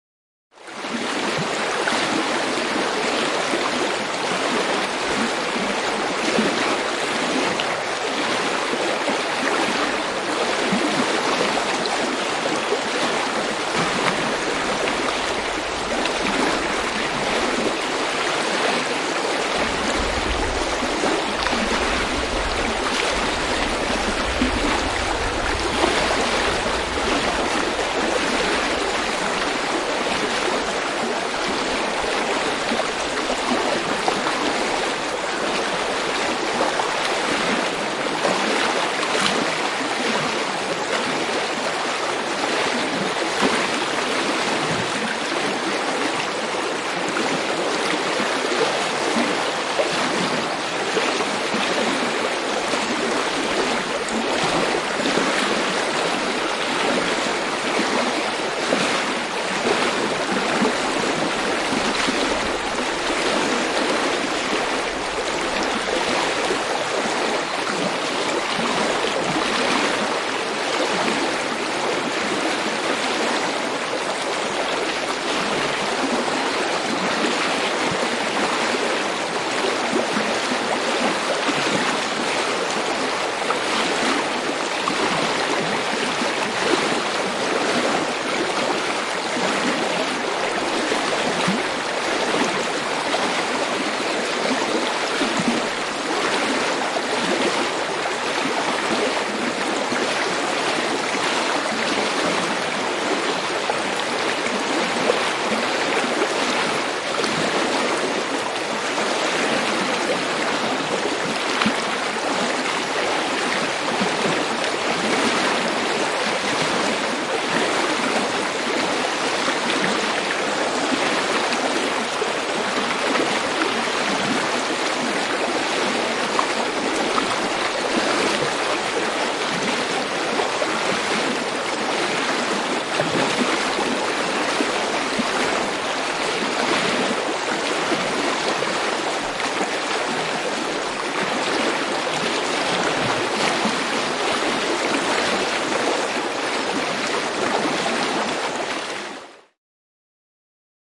Joki, vesi solisee ja kohisee / River, stream floating, gurgle an babble, a close sound
Joki virtaa, solinaa ja kohinaa. Lähiääni.
Paikka/Place: Suomi / Finland / Kuusamo
Aika/Date: 01.06.1991
Babble, Finland, Finnish-Broadcasting-Company, Gurgle, Kohina, River, Solina, Soundfx, Stream, Suomi, Tehosteet, Vesi, Virta, Water, Yle, Yleisradio